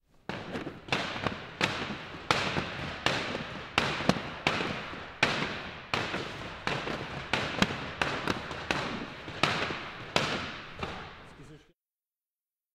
Rope Lano 1
Moving the rope on the wooden floor
wooden, lano, gym, floor, Rope